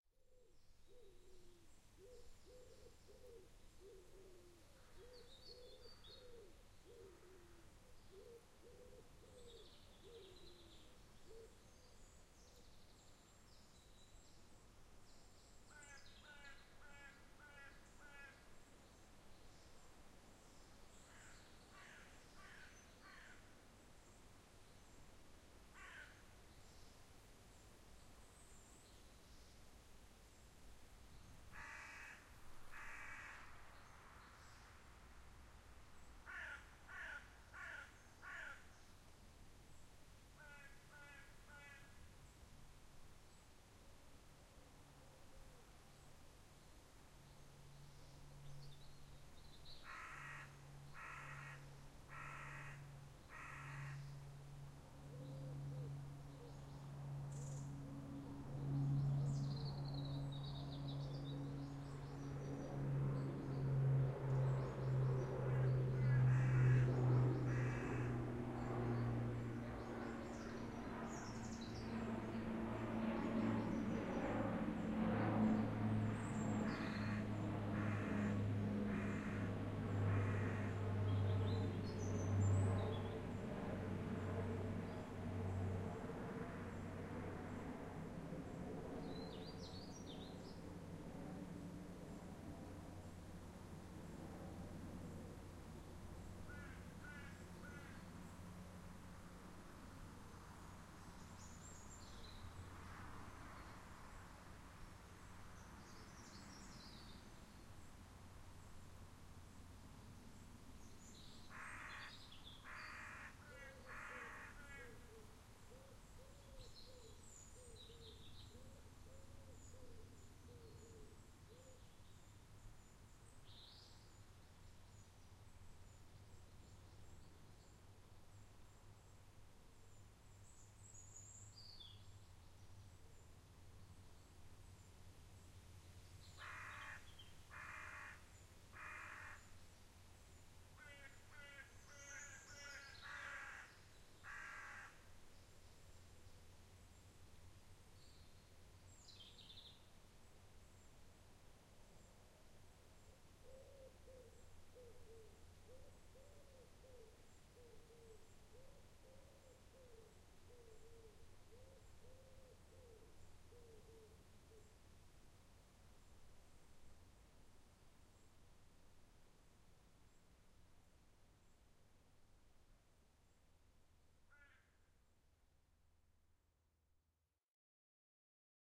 DawnBirds LightAircraft Binaural
Recorded at 5am. Various birds do their morning chores. At around 1 minute, a light propeller aircraft flew over from nearby East Midlands airport. This is a binaural mixdown from an ambisonic recording.
binaural, birds, dawn, field-recording, light-aircraft, morning, propeller